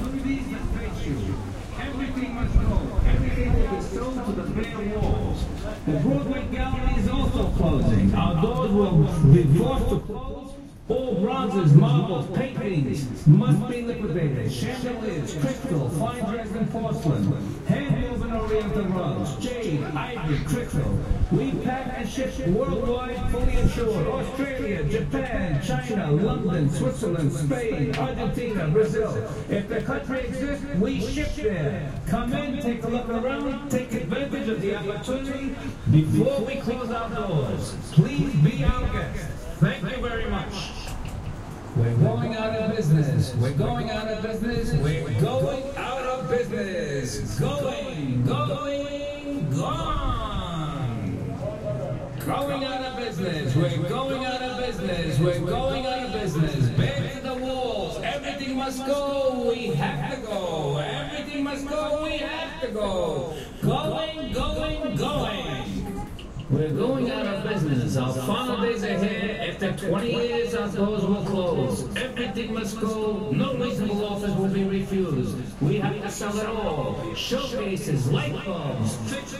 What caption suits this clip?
One of the many stores near Times Square (NYC) which are perpetually going out of business installed this public address system recently and was "going to town" trying to bring in customers. It didn't really appear to be impressing anyone.
barker, new-york-city, pitch-man, public-address, sale, sidewalk, times-square